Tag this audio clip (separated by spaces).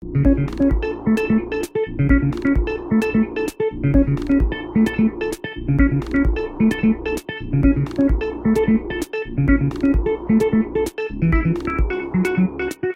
audio-library background-music download-background-music download-free-music download-music electronic-music free-music free-music-download free-music-to-use free-vlogging-music loops music music-for-videos music-for-vlog music-loops prism sbt syntheticbiocybertechnology vlog vlogger-music vlogging-music vlog-music